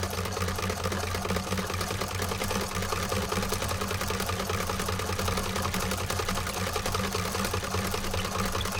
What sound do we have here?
sowing machine gritty loop
Here is a recording of a singer sewing machine, i think it might even have been a pre-wwII one. Manual of course. It sounds very nice and gritty.
It was recorded using a Sound Devices 722 and a Studio Projects B-1 microphone.
field-recording, gritty, loop, machine, sew